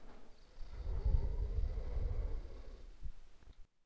Dragon Ambience
The sound of a Dragon at rest, not eating sheep or flying high but calmly resting in it's cave. Use it for whatever you would like.
Roar, Animal, Monster, Fantasy, Bear, Beast, Snarl, Growl, Breath, Lion, Dragon, Breathe